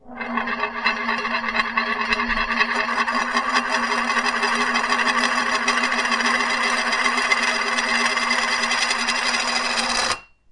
Spinning Jar Cap
Spinning a jar cap on a hard surface.
Recorded with Olympus LS-14
roll, spinning